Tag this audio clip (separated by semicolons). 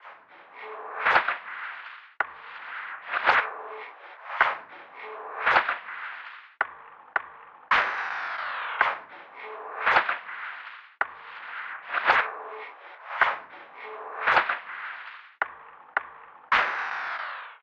109; beat; bpm; dark; highpass